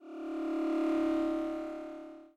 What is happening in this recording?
Vibration Short Woosh 01
Simple woosh for different purposes.
Thank you for the effort.
fade, 8bit, old, useful, sample, computer, original, sound, school, effect, retro, woosh, intro, cool, game, tune